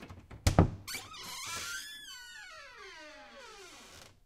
opening squeaky wooden door
Creaky wooden door opened. recorded in studio (clean recording)